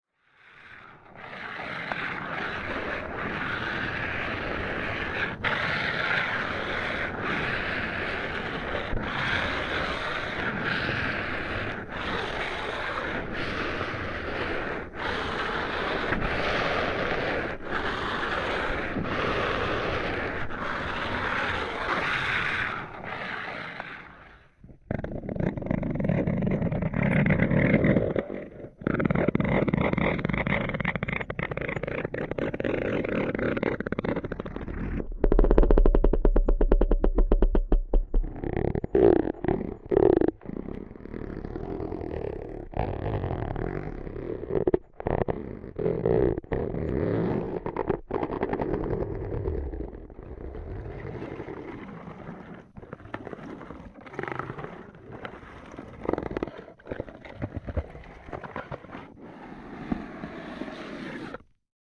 Just few sounds made with contact microphone. No post processing.